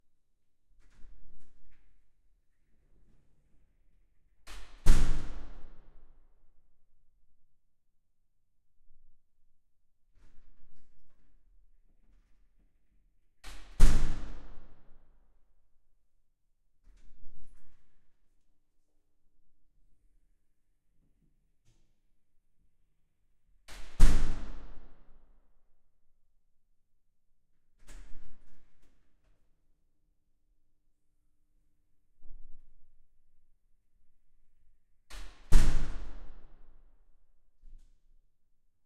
Recorder: Fostex FR-2
Mic(s): 2x Audix SCX-1 O (Omni)
Mic Position(s): about 15cm from L/R walls of a 1.5m wide, but long hallway; 2m away from door; about 1.5m height; 'outside'
Opening and closing of a heavy metal door (with big, heavy security glass inserts) within a long (flat concrete) hallway.
This recording was done on the 'outside', meaning that the door swings away from the mics while opening.
Also see other recording setups of same door within package.
door, metal